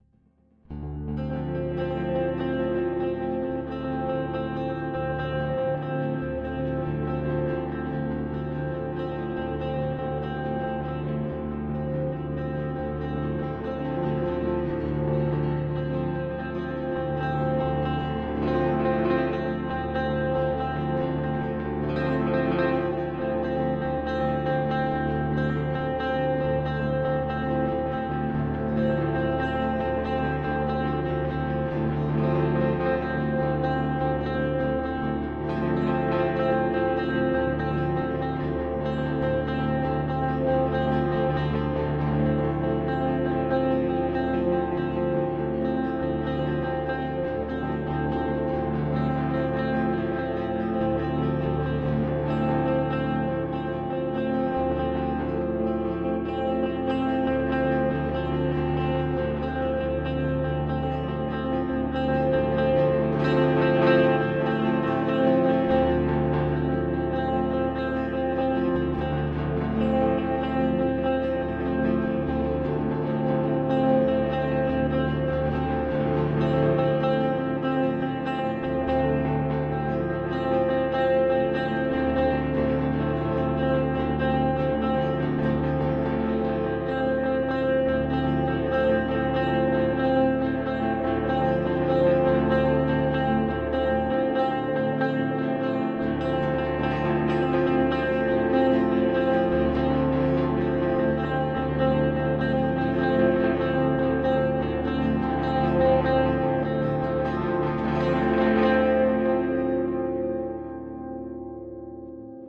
Electric mandocello drone in Dm
An electric mandocello drone in the key of G minor
Mandocello plays the chord of Dm
Performed on an Eastwood "Warren Ellis" series electric mandocello
Can be layered with the other drones in this pack for a piece of music in Gm
D-minor, drone, electric-mandocello, Key-of-G-minor, mandocello